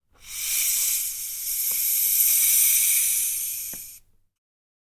Bicycle Pump - Plastic - Slow Release 04
A bicycle pump recorded with a Zoom H6 and a Beyerdynamic MC740.
Pump, Pressure, Valve, Gas